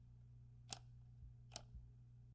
light switch, or any wall switch.